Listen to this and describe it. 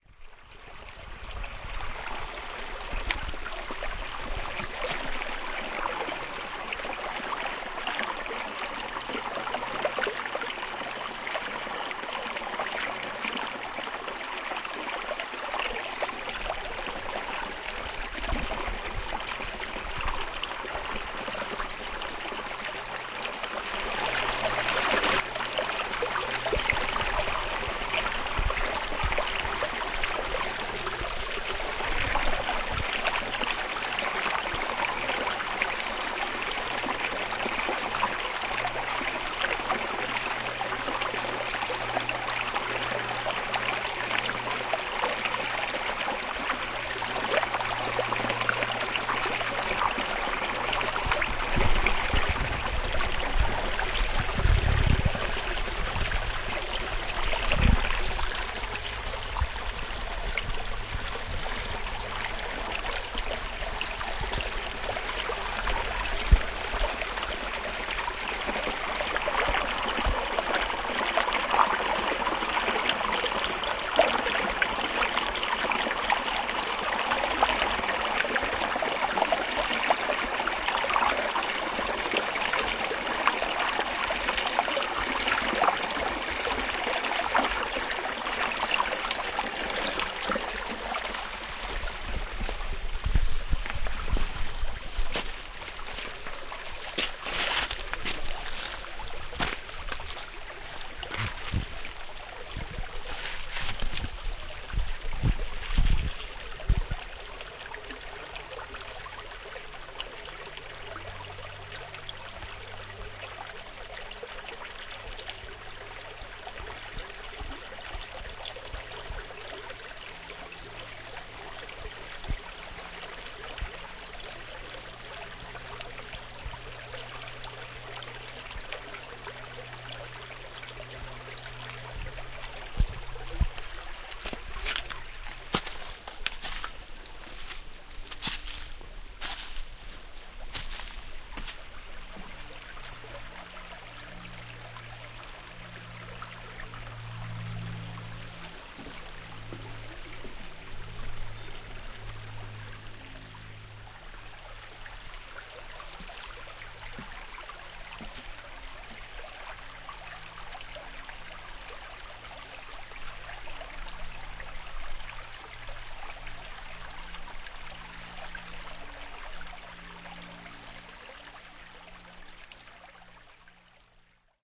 Stowe Brook 1
A brook in a forest in Stowe, Vermont, recorded in mid October with a Marantz PMD661 using an Audio-Technica BP4025 stereo microphone.
field-recording, brook, Vermont